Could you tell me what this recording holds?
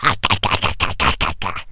A voice sound effect useful for smaller, mostly evil, creatures in all kind of games.